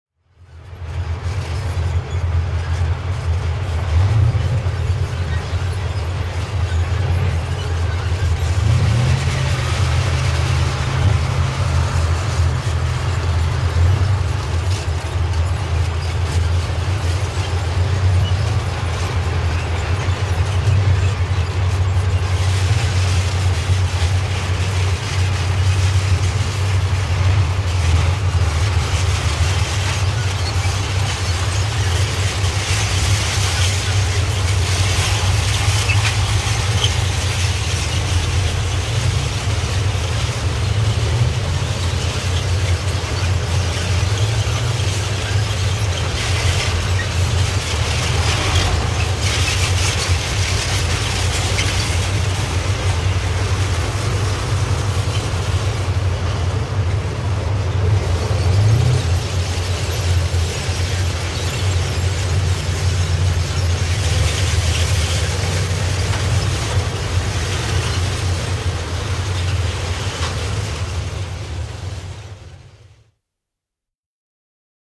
Panssarivaunut kadulla / Tanks on the street, tracks creaking
Panssarivaunut ajavat kadulla, telaketjut kitisevät.
Paikka/Place: Suomi / Finland / Hämeenlinna
Aika/Date: 1969
Tanks, Telaketjut, Tracks, Tehosteet, Finland, Suomi, Field-recording, Yle, Soundfx, Finnish-Broadcasting-Company, Yleisradio, Panssarivaunut